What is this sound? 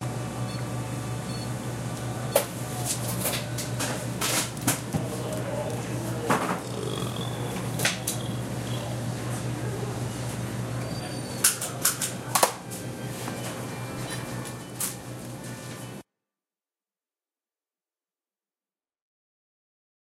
burp at an office
me burping at an office calculator and receipts.
burp, human, belch